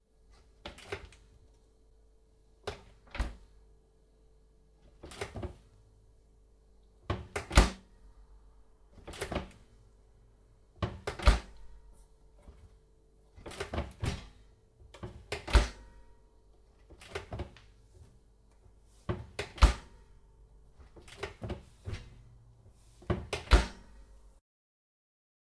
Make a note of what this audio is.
opening and closing an oven with a few variations